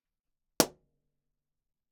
Balloon Burst Pop 1
Recorded as part of a collection of sounds created by manipulating a balloon.
Pop, Balloon, Bang, Burst